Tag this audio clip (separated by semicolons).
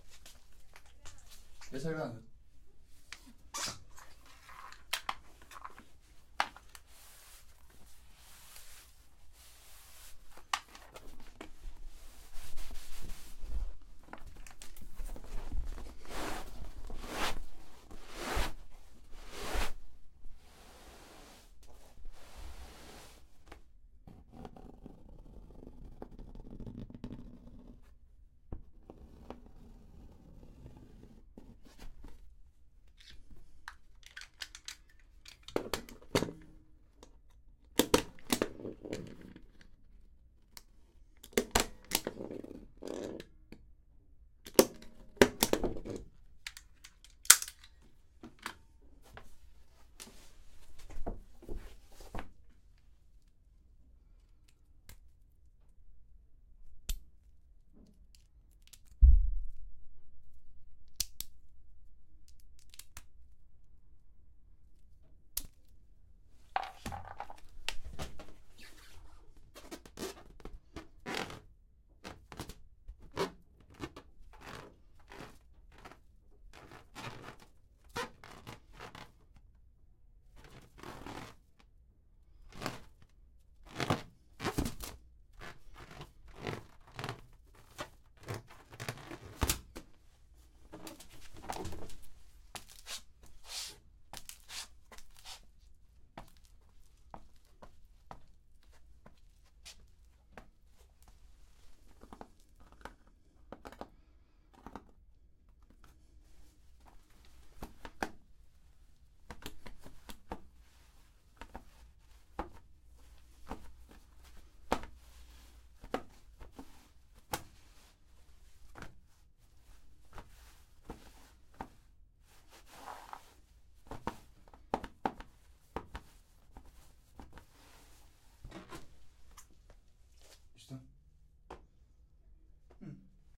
Microphone; Season